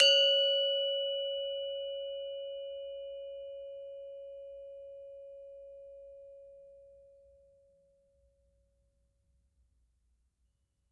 University of North Texas Gamelan Bwana Kumala Ugal recording 12. Recorded in 2006.